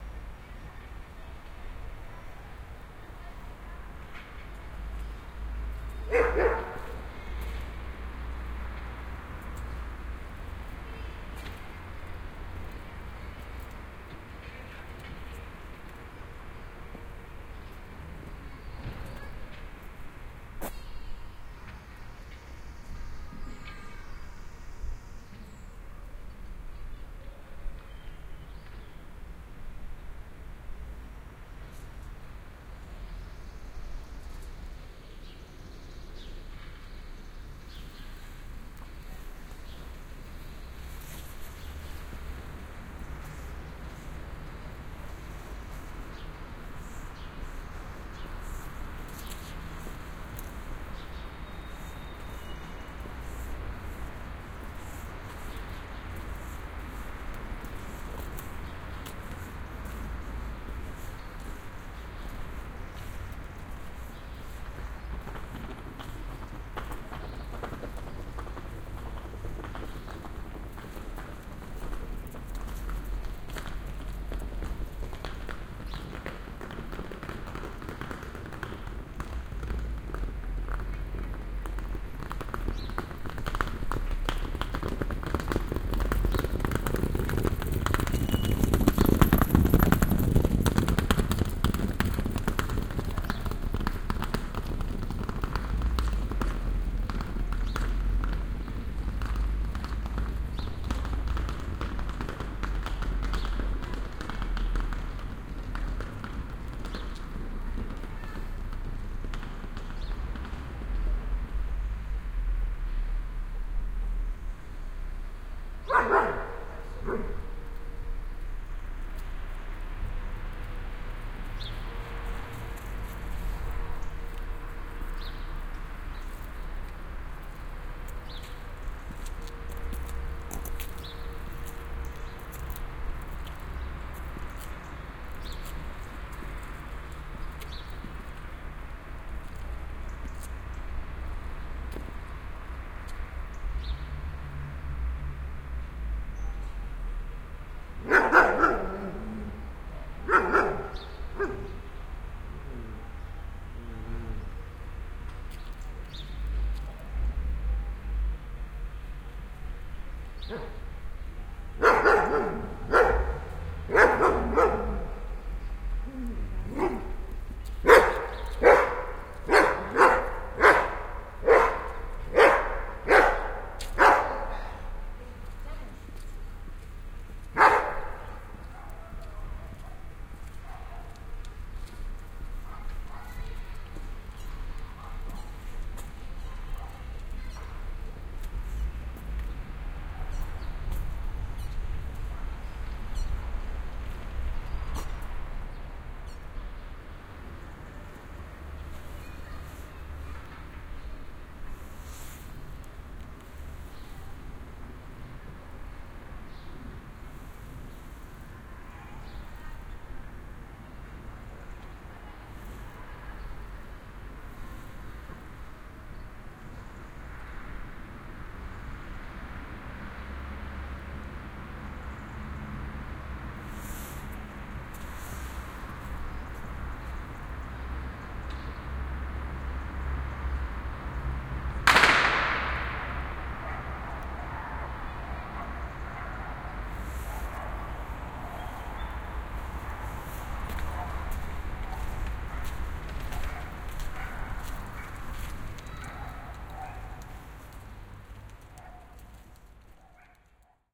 Parque urbano 2
ambiance, ambience, binaural, city, dogs, field-recording, paisaje-sonoro, park, PCM-M10, Sony, Soundman-OKM-II, soundscpae, urban